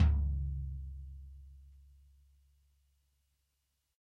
Dirty Tony's Tom 16'' 045
This is the Dirty Tony's Tom 16''. He recorded it at Johnny's studio, the only studio with a hole in the wall! It has been recorded with four mics, and this is the mix of all!
tom, pack, realistic, drumset, raw, dirty, punk, real, tonys, kit, set, drum, 16